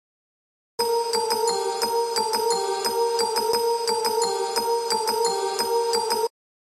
Christmas Bells 1
Christmas stuff can never come too early, right? This is a bell melody for holiday songs. It's really cool-sounding. This sound was created with Groovepad.
dance, edm, holiday, jolly, santa-clausxmas, techno, wintermerry